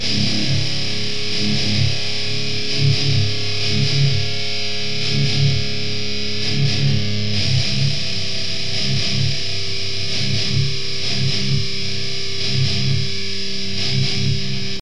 2 guitar goove loop 1
i think most of thease are 120 bpm not to sure
1, groove, guitar, hardcore, loops, rythem, rythum, thrash